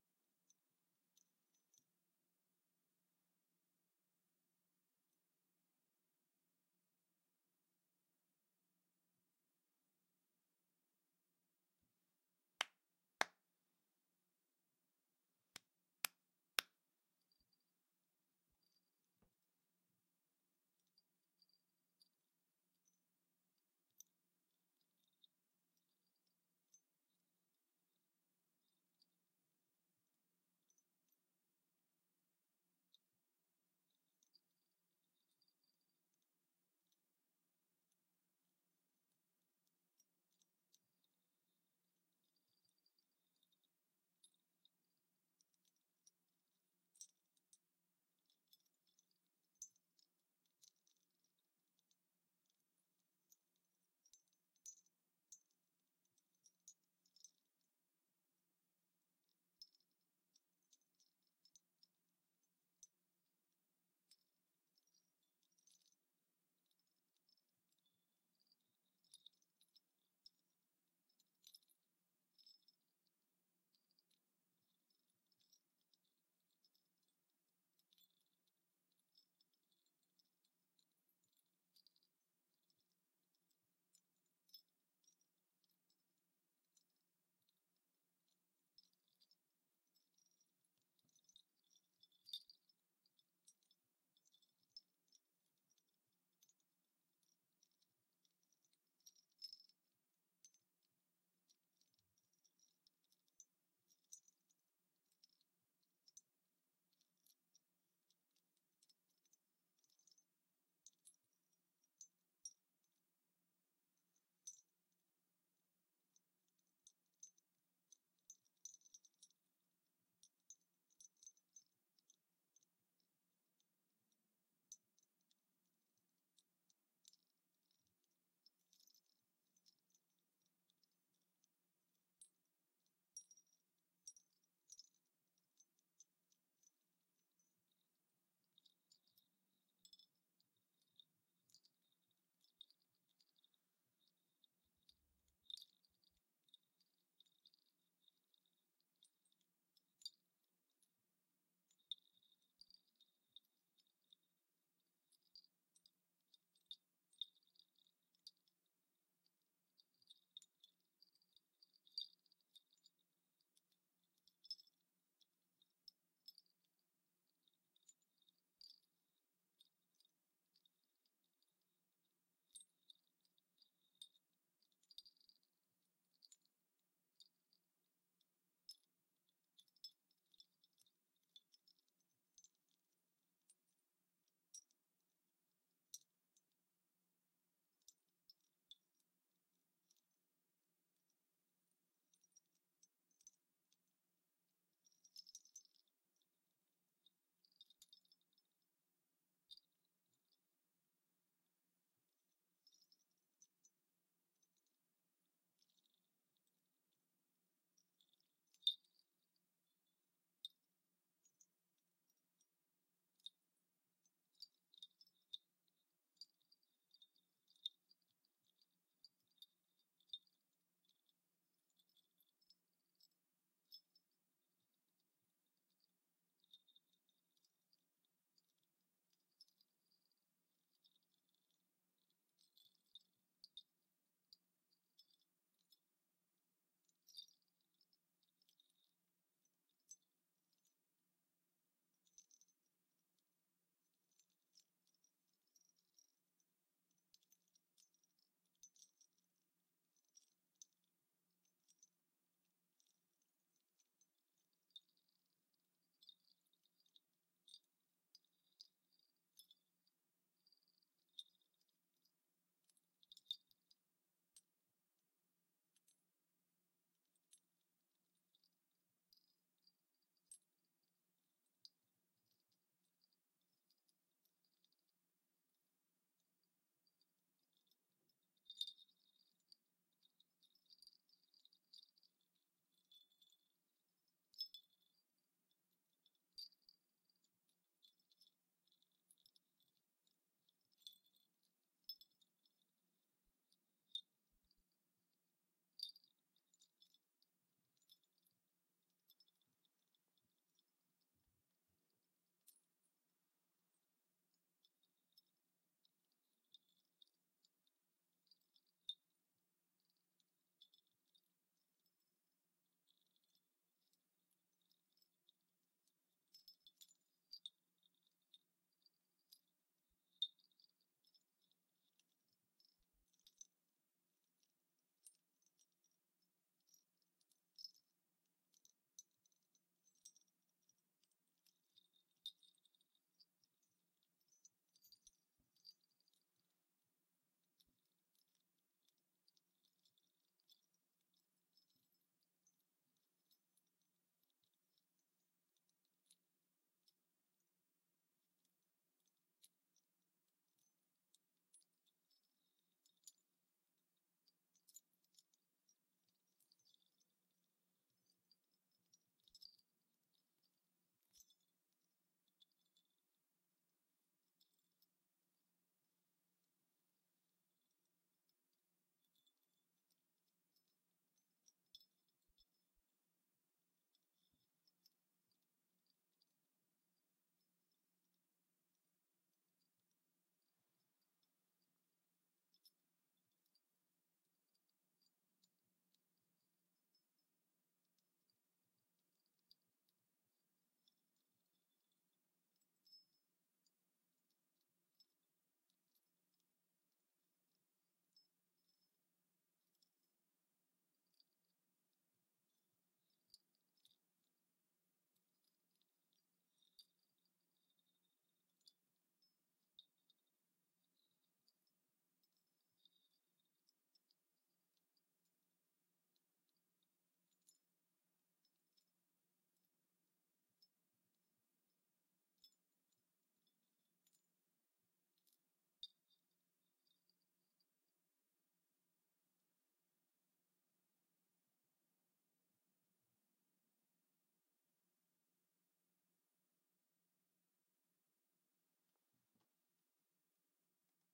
gentle tinkling bells
Recording of me gently moving the bells on an Indian brass anklet.
Recorded on an AKG D5 through Audacity, no post-production
anklet-bells, bangle, bells, gentle, tinkle, tinkling